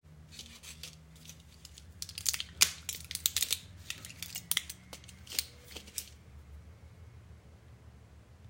aluminium-pills
This is the sound of pulling a pill out of a plastic and aluminum packaging
Este es el sonido de sacar una pastilla de un empaque de plástico y aluminio
Grabado en Apple Watch Series 6